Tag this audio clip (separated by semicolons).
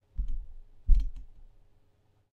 move; microphone; condenser